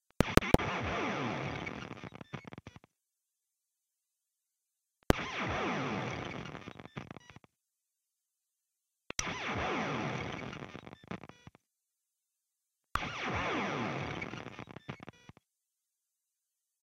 Amp Tails 2009-09-05 001
This sound is posted here for experimental purposes. I don't expect it to be of much use for musical or other purposes...
Some old experiments of mine involving the guiar amp modelling software Revalver III produced some kind of digital artifact on the tail of some of the sounds. The key ingredient to creating this sound were the amp models 6505 and 6505+.
Either ot them would create this tail sound, although you might need to sample the sound and amplify the tail to notice it, since it is very low volume.
The tail sound seemed to be pretty much the same, regardless of the sound fed into the amp - the only requirement was that the sound decayed quickly so it would not merge with and mask the tail.
My early experiments were posted here:
A recent discussion with another Freesounder brought up my interest for these sounds again and I have dug up some more of the recordings made in those sessions (back in 2009), which I had previously left untouched in my hard-drive.
Revalver-III, amp-VST, amp-modelling, amplifier, arifact, experimental, glitch, noise, virtual-amp